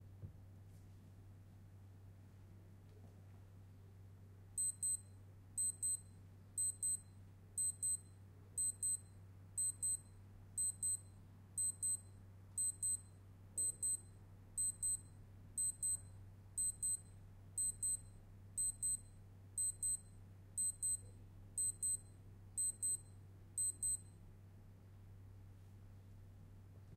Alarma Reloj Casio
Alarm on a Casio Watch
Joaco CSP
Alarm, Reloj, Watch, Alarma, Casio